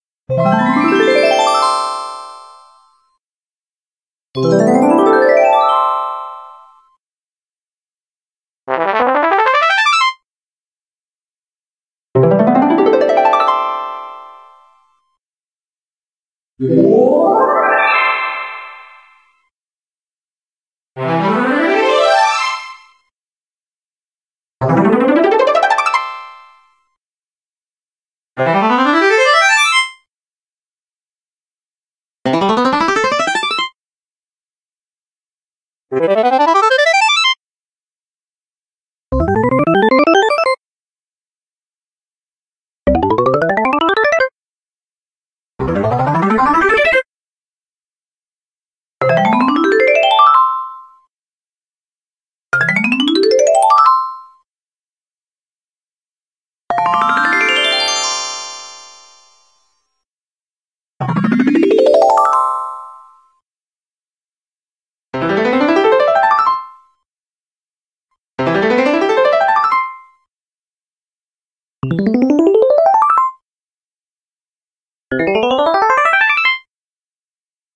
Level Up
Just a random sound I created in my piano. Originally it supposed to be a music, but after I failed making it, I decided to cut this part out.
The first one is the original version and the rest were alternate versions I created.
Made in 3ML Piano Editor
digital, effect, game, level, random, sfx, sound, up, various